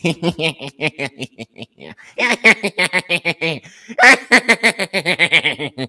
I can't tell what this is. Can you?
Try-hard mischievous laugh.
Voice, Laugh, Wheeze, Happy-Laugh, Creepy-Laugh, Laughing